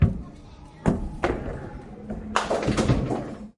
B 2 Bowling ball striking pins
Bowling ball hitting pins Strike!
ball, beach, bowling, pin, seasideresort, ten, yarmouth